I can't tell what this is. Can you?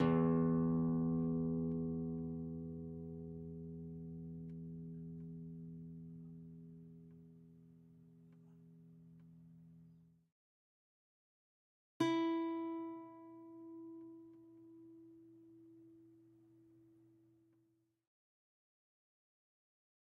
Open E and e strings on an old acoustic guitar, recorded with an AT3035.